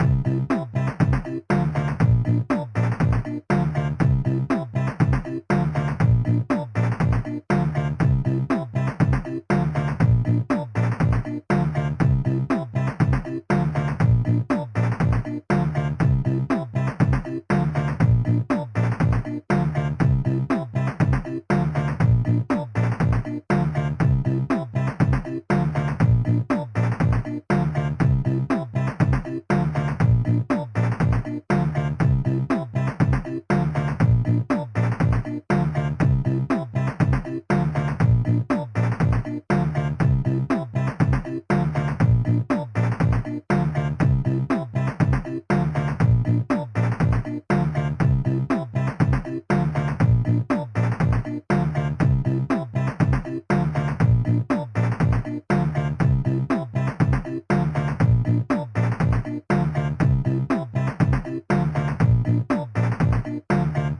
8 bit game loop 004 simple mix 1 long 120 bpm
120, 8, 8-bit, 8bit, 8-bits, bass, beat, bit, bpm, drum, electro, electronic, free, game, gameboy, gameloop, gamemusic, loop, loops, mario, music, nintendo, sega, synth